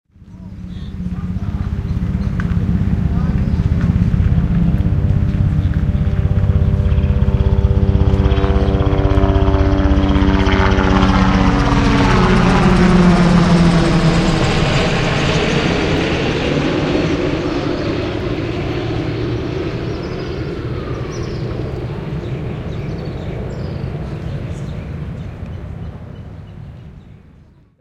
Helicopter flying over hospital grounds
This is a binaural recording of a helicopter flying overhead. The recording was made in the parking lot of a local hospital.